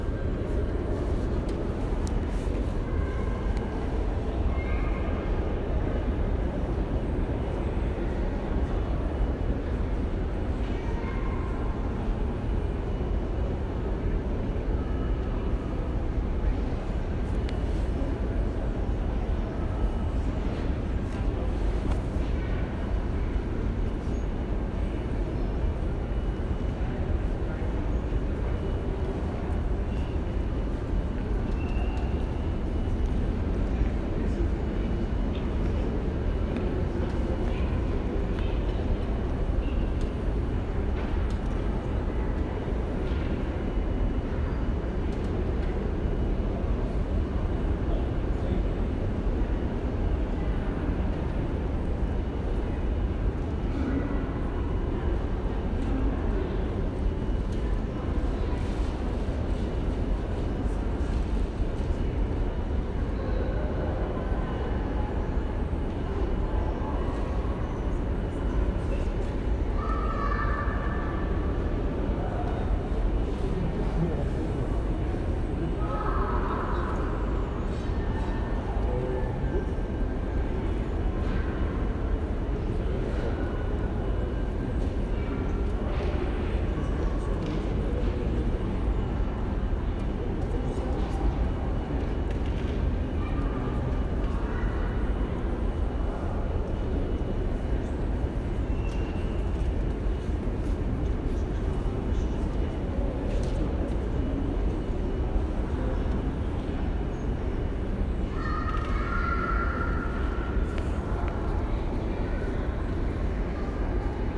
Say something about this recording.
Gare Centrale de Montréal, December 31 2018, 17:57
Overall ambience from Montreal’s Central Station on New Year’s Eve, at a relatively quiet time.
Recorded with an iPhone 6s Plus, internal microphone.
ambience, field-recording, soundscape, train-station